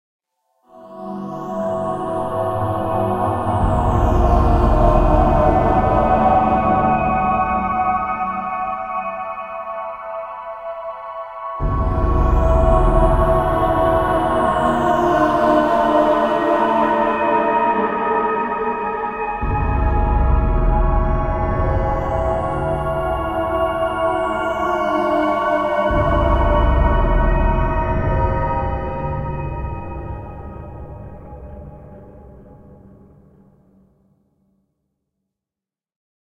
Das Artefakt 3
A set of variations of a scary soundscape.
Entirely made on a PC with software. No Mic or Instrument used. Most of the software I used for this is available for free. Mainly used: Antopya, Chimera and the Alchemy Player.